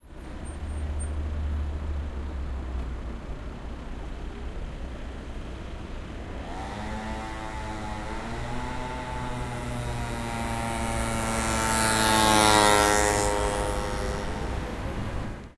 Traffic and motorbike.
20120324
spain, caceres, traffic, motorbike